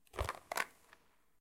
Picking up small box with items inside
Needed an ingame sound for picking up an item (little paper box with stuff inside), recorded with Zoom H4n
grab, pick-up